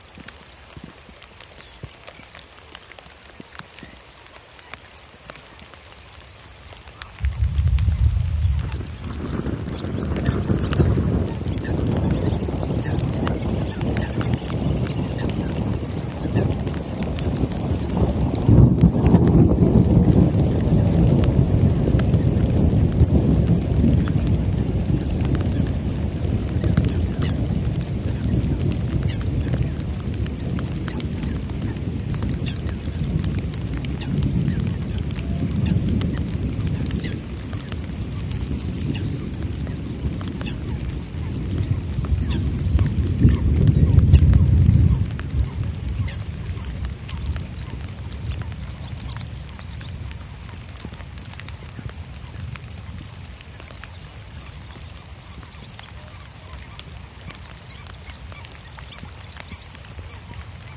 one-minute long thunder, with birds (Swallow, Coot) and rain drops in background. Nice low-end, not distorted (thanks to the external preamp). Recorded near Centro de Visitantes Jose Antonio Valverde, Donana (S Spain) using a pair of Shure WL183 into Fel BMA2 preamp, PCM M10 recorder